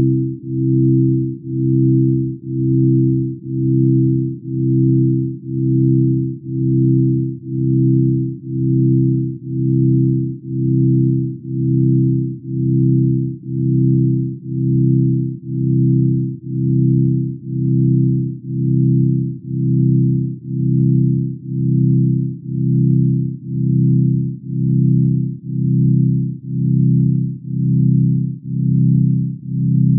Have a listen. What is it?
Long multisamples of a sine wave synthesized organ with some rich overtones, great singly or in chords for rich digital organ sounds.
pad, drone, synth, organ, multisample